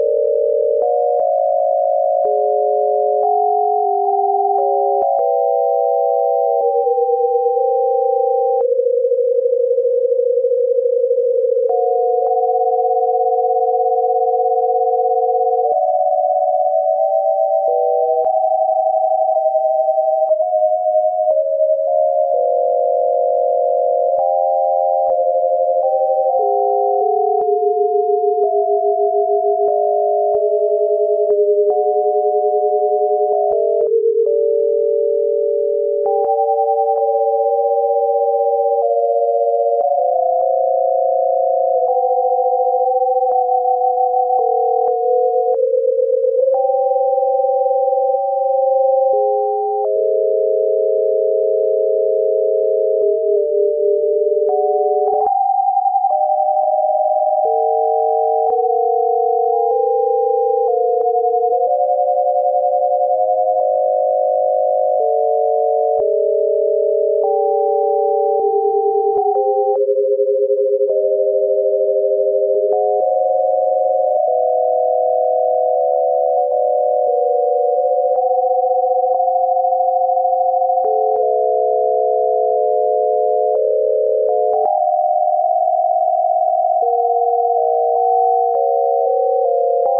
random switcher
generator, drone